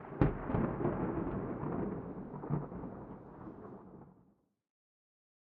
balfron thunder H
Field-recording Thunder London England.
21st floor of balfron tower easter 2011
England, Field-recording, London, Thunder